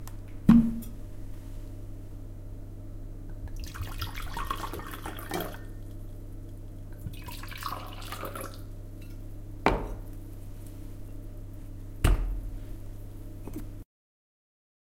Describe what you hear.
Opening a bottle of wine, then pouring it into glasses.
glass, wine, open, drink, bottle